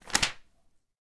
Page Turn 25
32/36 of Various Book manipulations... Page turns, Book closes, Page
magazine, newspaper, page, read, reading, turn